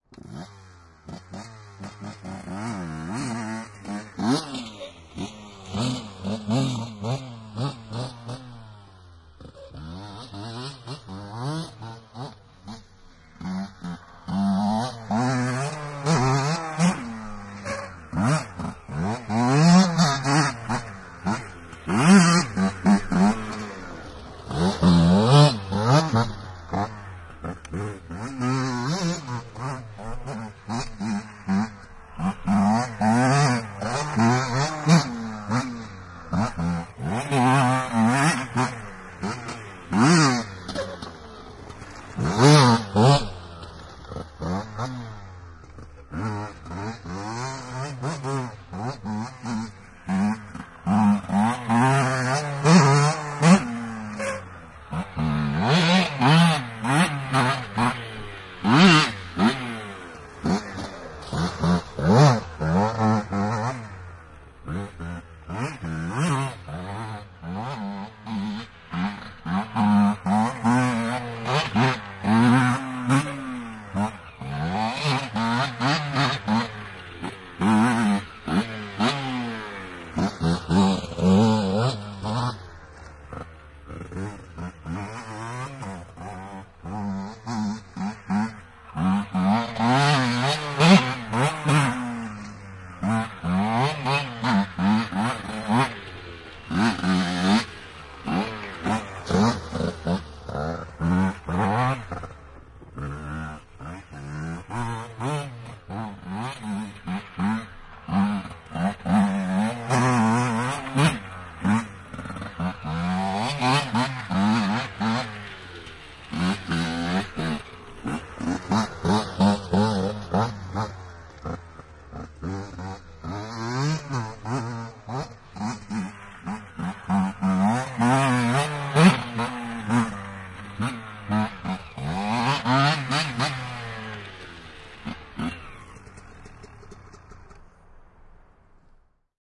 Moottoripyörä, viritetty, ajoa soralla / An enduro motorbike, soaped-up, start, driving and revving in a sandpit, microphone following, stopping, shutting down, Husqvarna 125 cm3, a 1991 model
Husqvarna 125 cm3, enduro, vm 1991. Käynnistys ja ajoa hiekkakuopassa mikrofonin seuratessa, pysähdys, moottori sammuu.
Paikka/Place: Suomi / Finland / Vihti / Haapakylä
Aika/Date: 34312